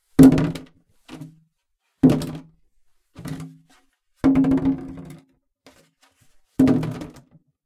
jug impacts
Dropping an empty plastic jug on concrete floor.
bottle, bounce, jug, bang, plastic, concrete, drop